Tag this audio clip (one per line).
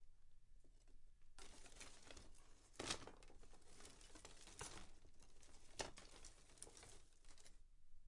bundle
wooden
wood
indoors